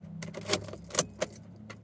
Turning Key
Foley Recording of putting a key into a socket and turning the key
cling, foley, hard-fx, hardfx, insert, key, key-inserting, keyring, keys, socket